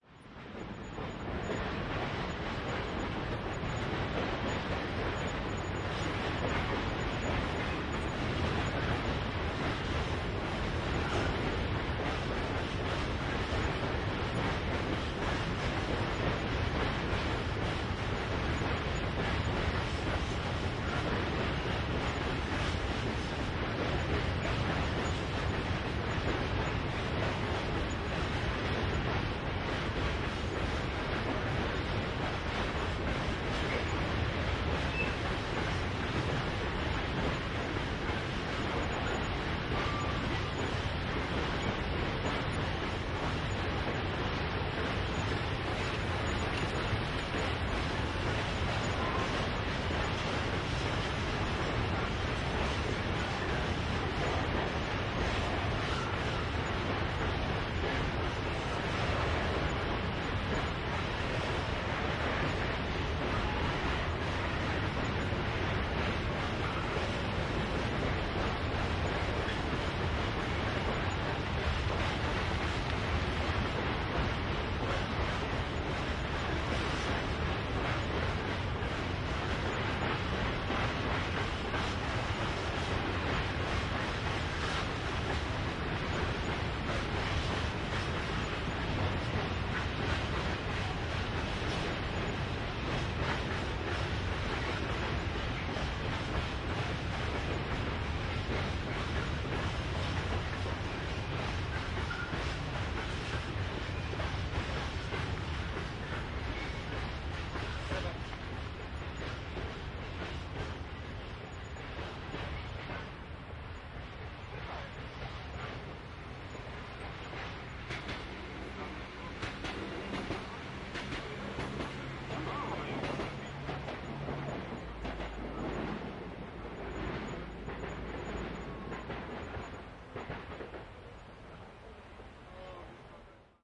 hi-fi szczepin 01092013 passing by trains gnieznienska street
01.09.2013: fieldrecording made during Hi-fi Szczepin. performative sound workshop which I conducted for Contemporary Museum in Wroclaw (Poland). Sound of passing by trains near of Gnieznienska street just in front of alotment in Szczepin district. Recording made by one of workshop participant.
marantz pdm661mkII + shure vp88
noise, Szczepin, Wroclaw, field-recording, train, Poland